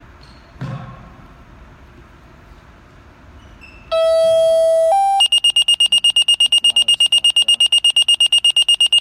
Onondaga County fire pager alerting for a call